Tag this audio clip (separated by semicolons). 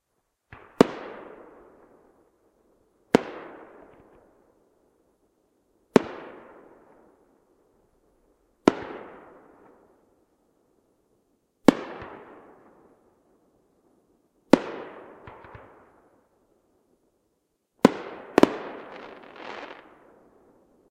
4; bang; boom; cracker; day; explosion; fire; independance; july; pop; rocket; show